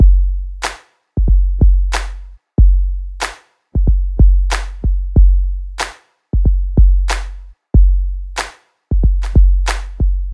TruthBeat 2 is the kick drum and claps without the snare overlayed on 2 and 4.